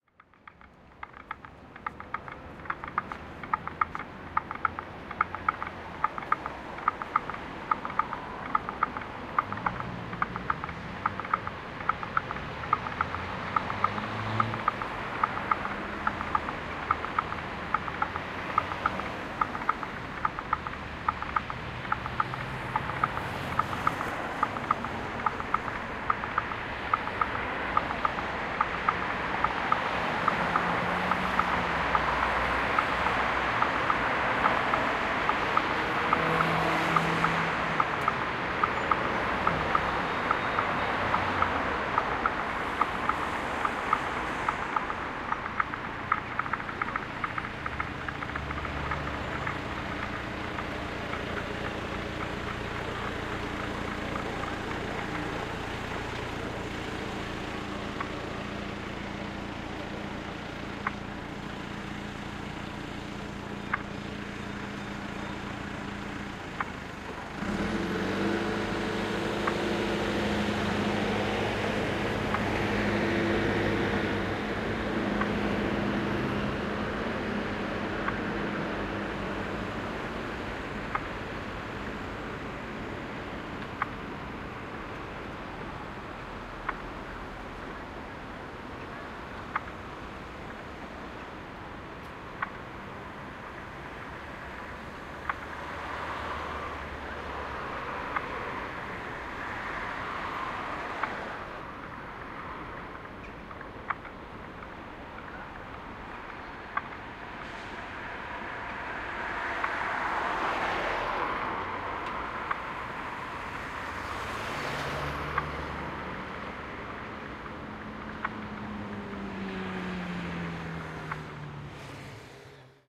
31.08.2013: Sound of traffic ticking of traffic lights on Legnicka street in Wroclaw (Poland).
marantz pdm661mkII + shure vp88